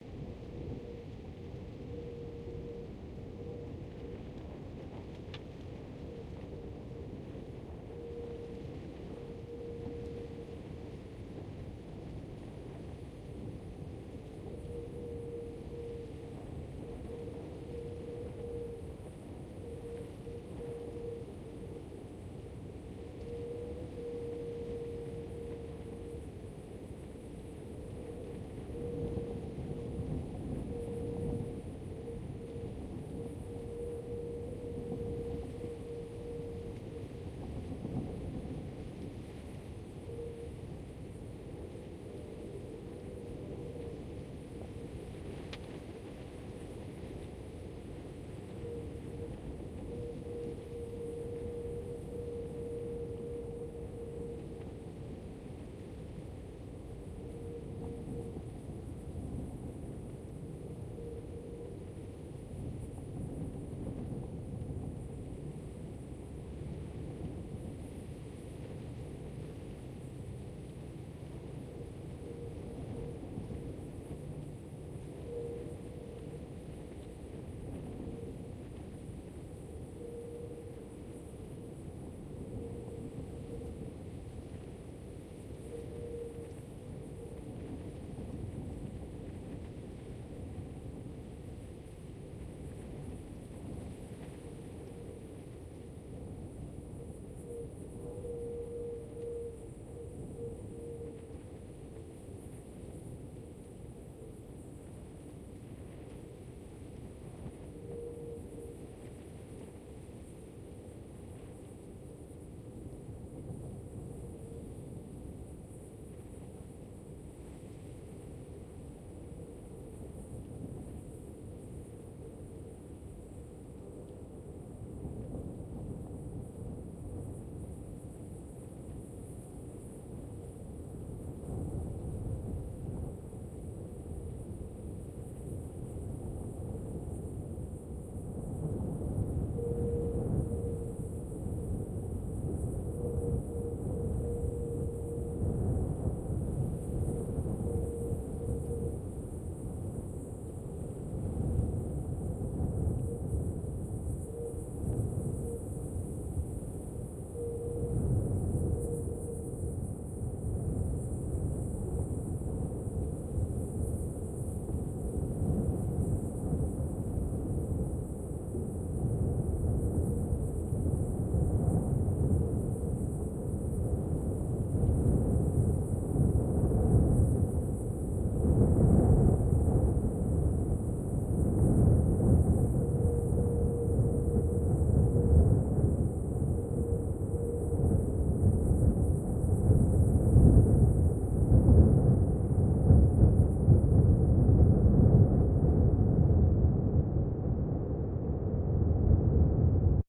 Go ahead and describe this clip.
diseño de ambiente Paraguaná
this is a background ambient sound design, made from: wind in a bottle, palm trees, insects, and strong wind. all this I recorded in "peninsula de paraguaná", venezuela with an Mkh416. Thx! and Enjoy!
ambience, background